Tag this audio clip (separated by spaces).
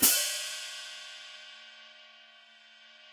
1-shot cymbal hi-hat multisample velocity